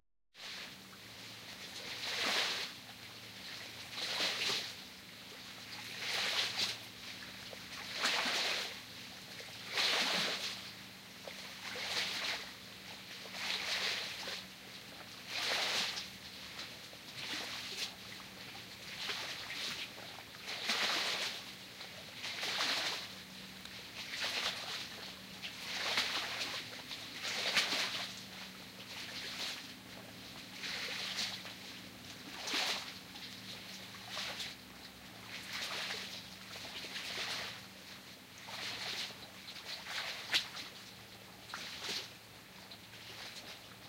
This was recorded next to the shore of the Tennessee River near Savannah in West Tennessee with a Samsung galaxy phone. The video was poor quality however I used Blender to separate the audio from the video. This was altered slightly with Audacity to reduce the constant noise of a distant motor boat.